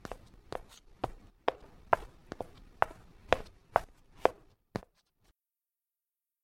Moving, female steps.